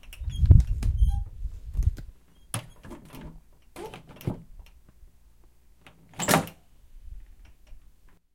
recorded with a zoom mic
creepy haunted house door! but actually just my door.